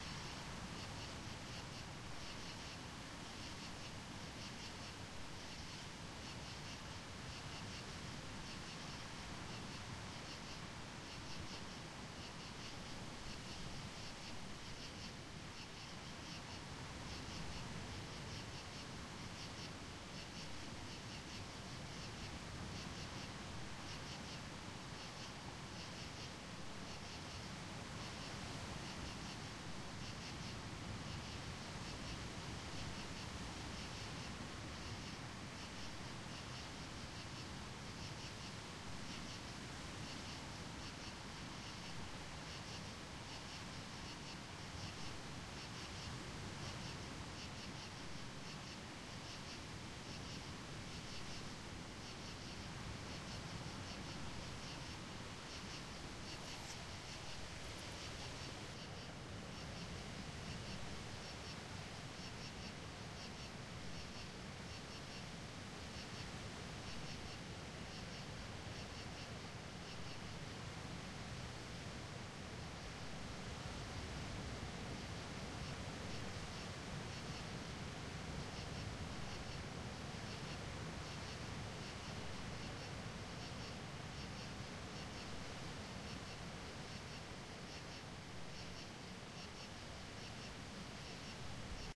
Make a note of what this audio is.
night at the beach
This is a recording taken on the shore of Lake Michigan in mid-September late at night. In the background you can hear the low roar of the waves and in the foreground the crickets, cicadas chirping through the night.
cicadas, crickets, dark, lake, michigan, night, summer, water, waves